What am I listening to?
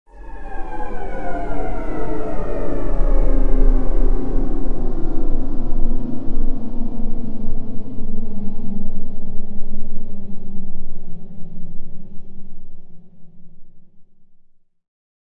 Noise I created, made to mimic the sound of a network of
computer systems shutting down.
Could be used for a game, or perhaps some type of sci-fi thing?
This sound, like everything I upload here,